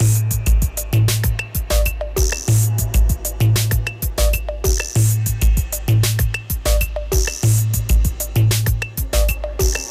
over tape
boss drum machine loop recorded to reel to reel tape with textural sounds in the background, then sampled with a k2000
analog, drumloop, percussion, processed, tape, texture, warm